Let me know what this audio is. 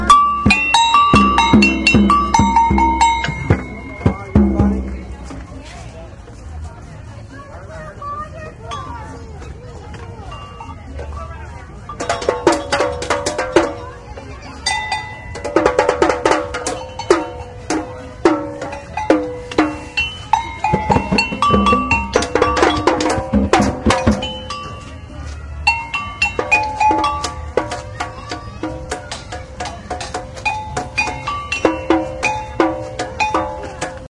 zoo amazon percussion
Walking through the Miami Metro Zoo with Olympus DS-40 and Sony ECMDS70P. Percussion instruments.